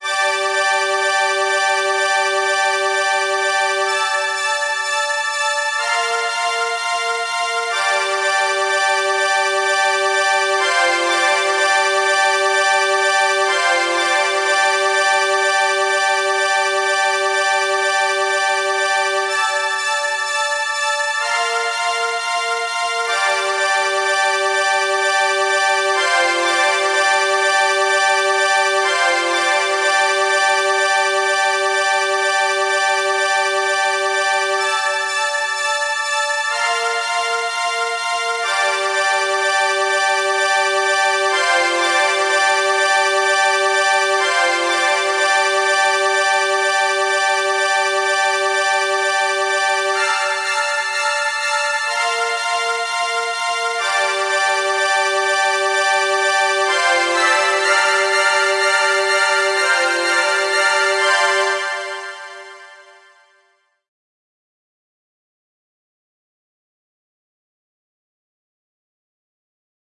LEARNING - TWO BACKS MIX - CLEAN STRINGS
This is a part of the song who i consider is the most important in this mix version. There have 5 parts of the strings and pad, and the conformation if you listen attentionally.
clean, mix, delcraft, pad, learning, strings, backs, two